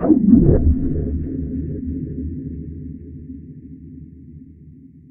there is a long tune what i made it with absynth synthesiser and i cut it to detached sounds
ambience, deep, experimental, drone, digital, noise, space, atmosphere, ambient, synth, fx, horror, sample, electronic, sound-effect, reverb, dark